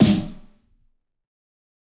Fat Snare EASY 033
This is The Fat Snare of God expanded, improved, and played with rubber sticks. there are more softer hits, for a better feeling at fills.
drum, fat, god, kit, realistic, rubber, snare, sticks